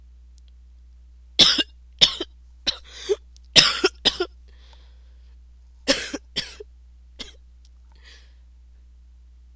Recorded myself coughing while sick.